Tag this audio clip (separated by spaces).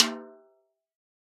1-shot snare velocity drum multisample